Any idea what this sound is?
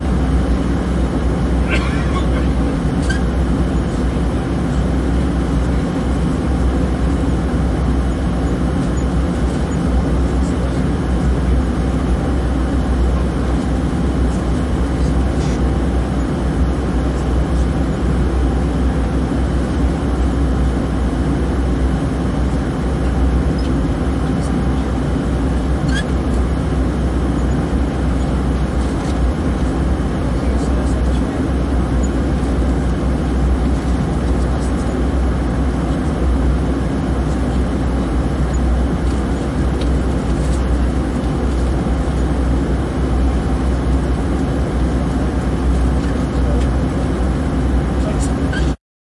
Aeroplane Ambience 01
Aeroplane, Airplane, Ambience, Cabin, Interior